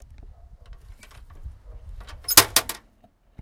Opening a letterbox
The sound of a metal letterbox opening and closing.